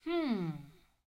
78gruñido otro
annoyed, male, scream